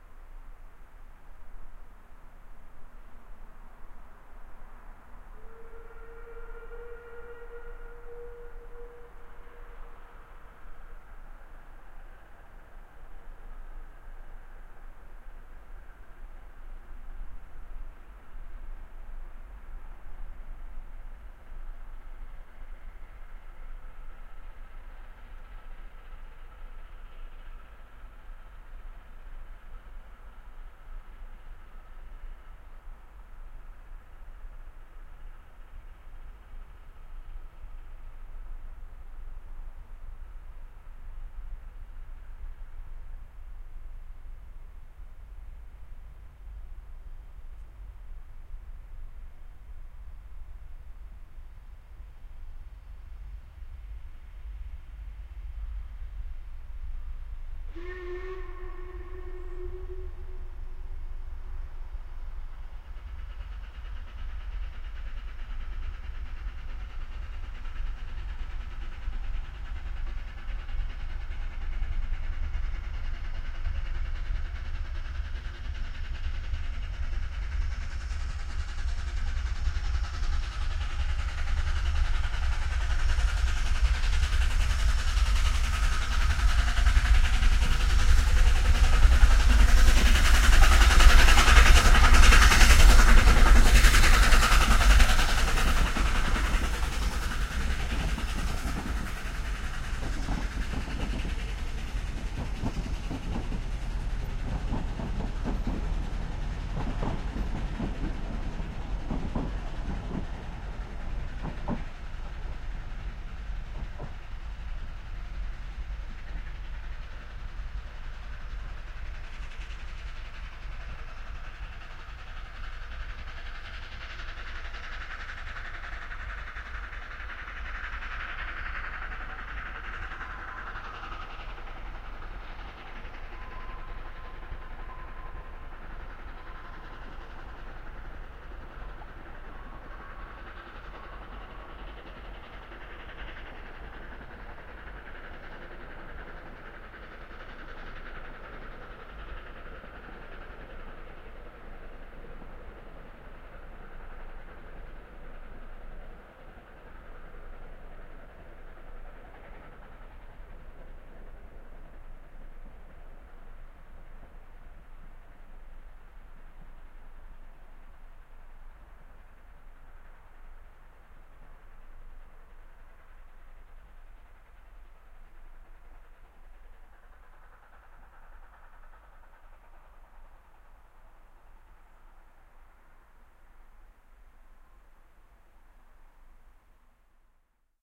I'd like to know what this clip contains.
A stereo field-recording of a narrow-gauge steam train (Blanche) powering uphill on the Ffestiniog Railway. Rode NT-4 > FEL battery pre-amp > Zoom H2 line in.